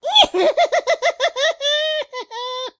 Evil Laugh 4
now for some high pitched squeaky laughter!
crazy, evil, laughter, mad, psychotic